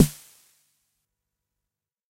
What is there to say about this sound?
various hits 1 110
Snares from a Jomox Xbase09 recorded with a Millenia STT1
909, drum, jomox, snare, xbase09